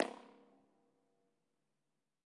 Tunnel 3 Impulse-Response cute flutter echo
Tunnel, Impulse-Response, echo, flutter, cute, 3